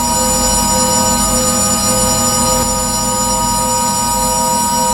Created using spectral freezing max patch. Some may have pops and clicks or audible looping but shouldn't be hard to fix.
Atmospheric, Background, Everlasting, Freeze, Perpetual, Sound-Effect, Soundscape, Still